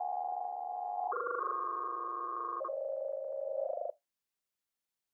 Computing dron. Start offset manipulations 2(Eq,cnvlvr)
The artificially generated sound of a data processing device. Perhaps it will be useful for you. Enjoy it. If it does not bother you, share links to your work where this sound was used.